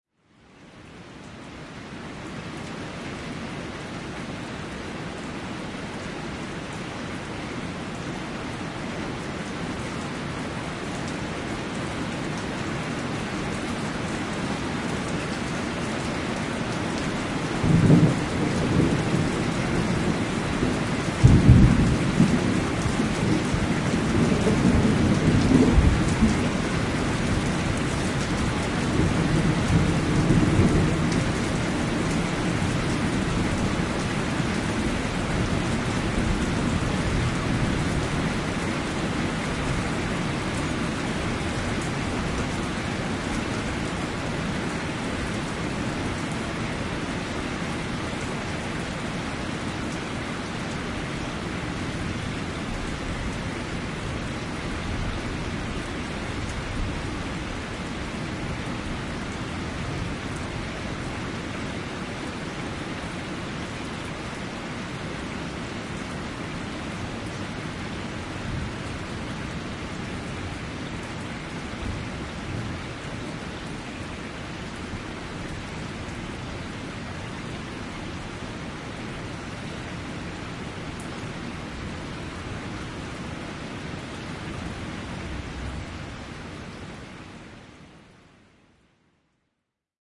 Rolling Thunder Dec 2007 2 - Short version
field-recording, thunder, splatter, stereo, weather, rain, atmosphere, drops, wet, water